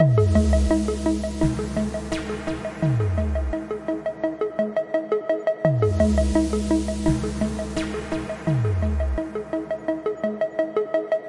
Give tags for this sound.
light
loop
ambient
chill
game
170bpm
noise

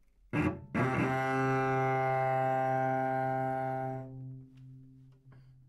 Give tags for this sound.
C3
cello
good-sounds
multisample
neumann-U87
single-note

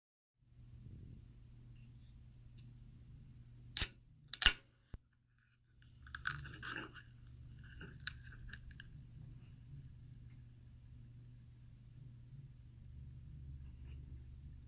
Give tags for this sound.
beverage
can
coke
drink
Soda

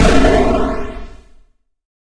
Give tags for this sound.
bomb
army
destruction
war
games
game
military

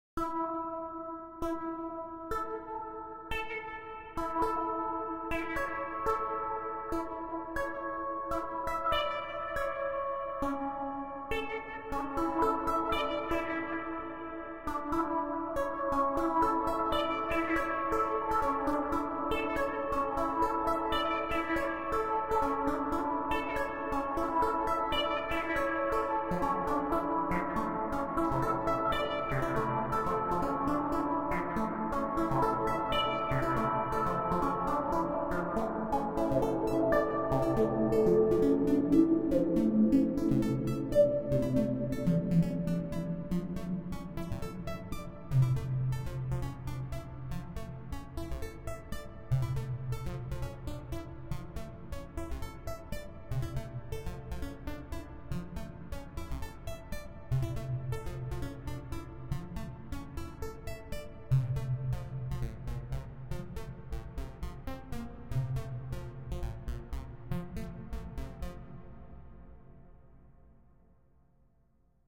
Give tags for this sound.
minimal
preview

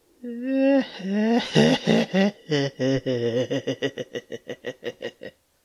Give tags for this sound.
Halloween
evil
laugh

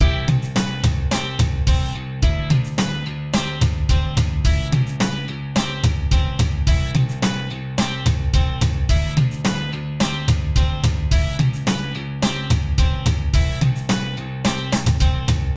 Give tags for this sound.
drums
guitar
ritm

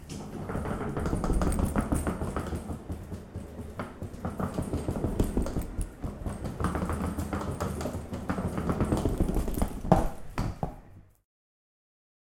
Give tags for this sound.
bag
wheels

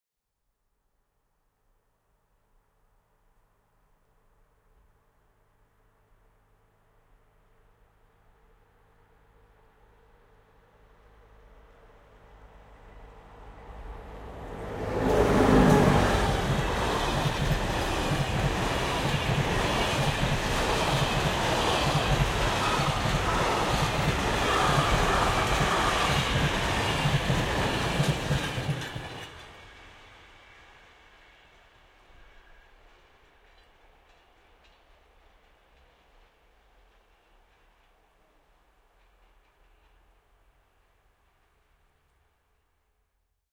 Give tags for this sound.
train
railroad

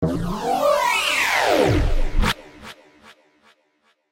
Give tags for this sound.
Take-off
UFO
Mechanical
Alien
Futuristic-Machines
Sci-fi
Electronic
Noise
Space
Landing
Spacecraft
Futuristic

